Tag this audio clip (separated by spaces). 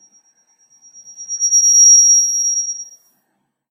noise harsh feedback oscillating squeal